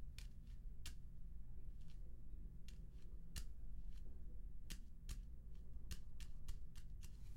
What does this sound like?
42. Pasos descalzo madera Steps on wood
Kid footsteps on wood floor, i made it with my hand and a table
Footsteps, Kid, Steps, Wood